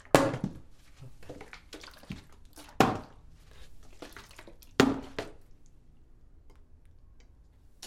Water Bottle Thrown to Ground
bottle, floor, water